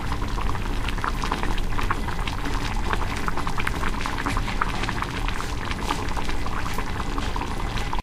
boiling.water
a jug with boiling water / un cacharro con agua hirviendo
andalucia, south-spain, field-recording, nature, house